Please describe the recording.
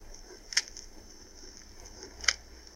The cracking of a vinyl.
Recorded with an Alctron T 51 ST.
{"fr":"Grésillements vinyle 1","desc":"Les grésillements d'un vinyle.","tags":"musique vinyle gresillement retro"}